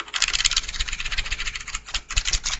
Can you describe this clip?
This sound is just a stylo rubbing against a wall,but im gonna improve it with FL studio 8 but just for now, i upload this